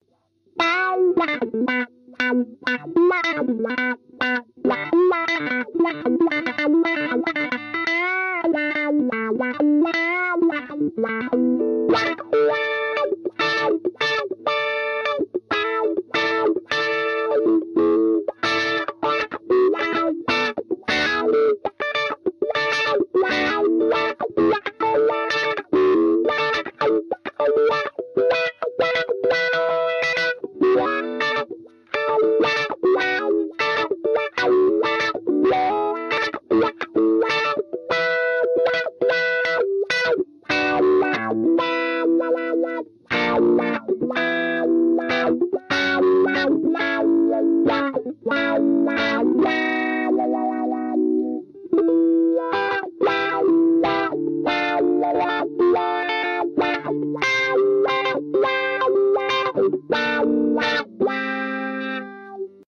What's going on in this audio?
Wah Wah 4
Easy song, with using guitar effect Wah wah